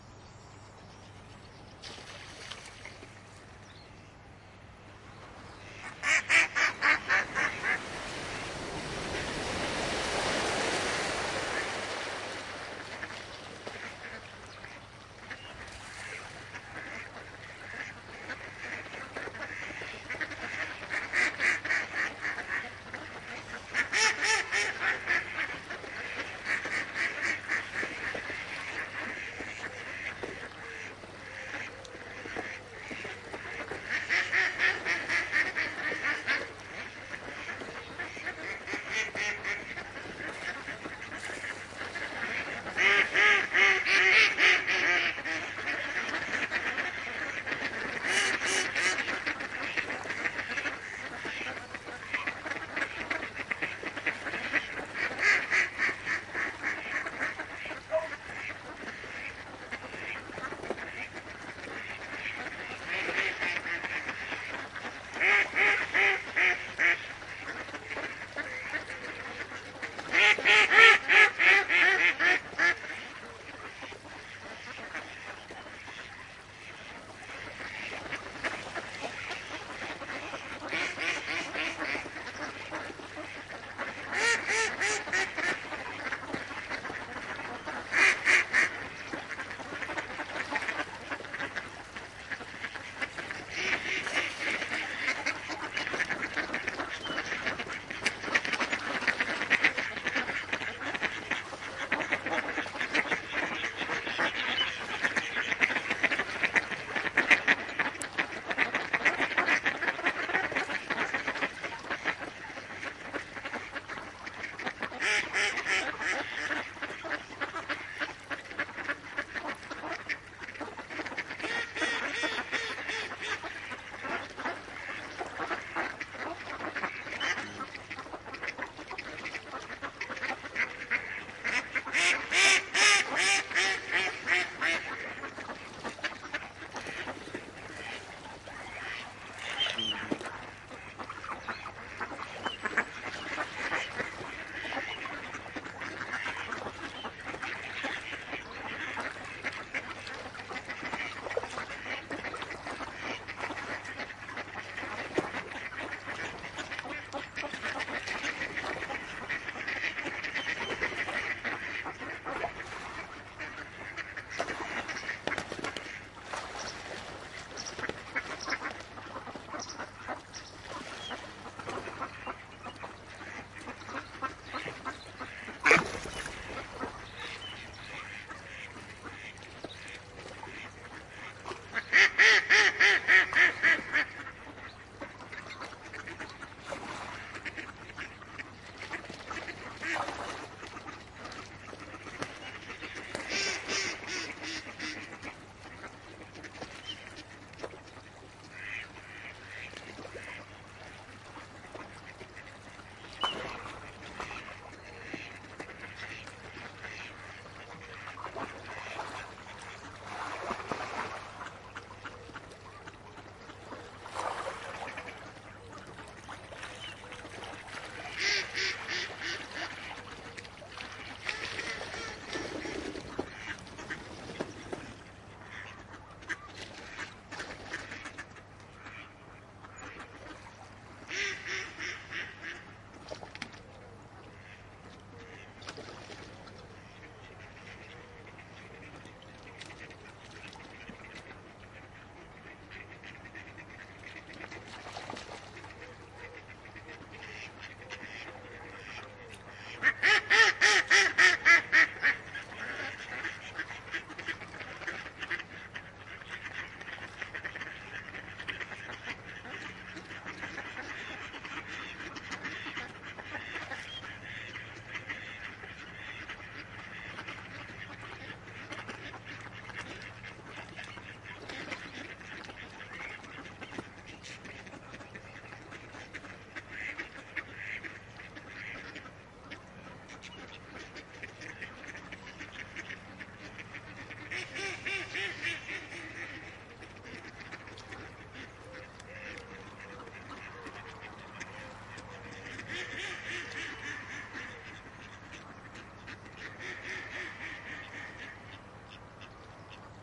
Hundreds of ducks
Five glorious minutes of the sound of ducks! Recorded on the Woodland Lakes holiday park near Thirsk in Yorkshire. Over 100 mallard ducks descend onto a small man-made lake in the midst of the holiday cabins and then proceed to quack loudly and splash about in the water.
It's not mandatory to tell me, I'd just be interested to know.